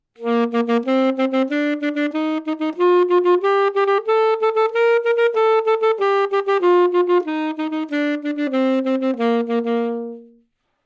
Sax Alto - A# Major
Part of the Good-sounds dataset of monophonic instrumental sounds.
instrument::sax_alto
note::A#
good-sounds-id::6644
mode::major
alto, AsharpMajor, good-sounds, neumann-U87, sax, scale